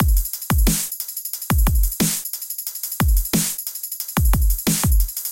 drumstep loop 180BPM
loop, 180, hi, kick, snare, dubstep, hat, BPM